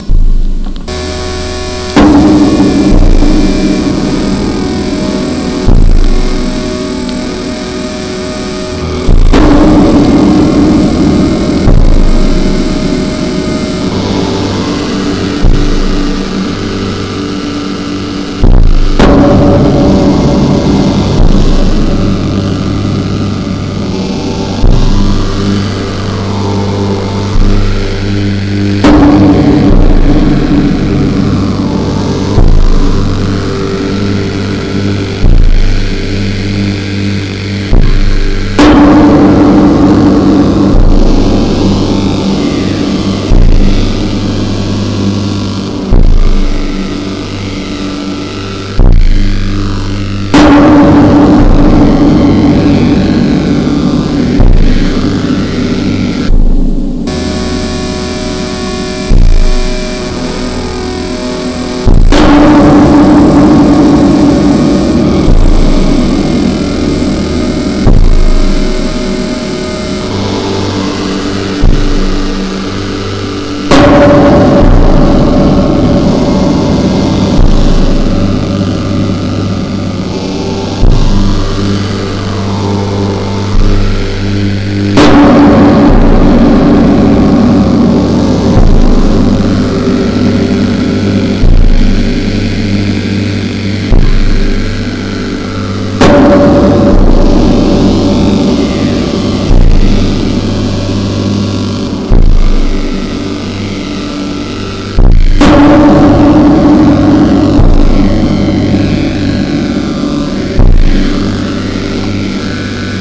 Demonstration of power and superiority.
Bass generator, hungarian type of table harp, human voices, harmonica.
feelings, emotion